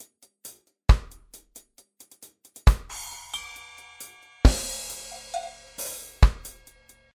Reggae drum loops
drum, loops, reggae